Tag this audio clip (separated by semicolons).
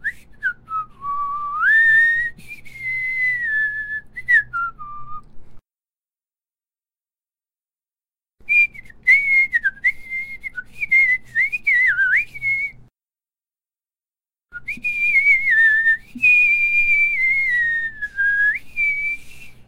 Water; Animals; Field-Recording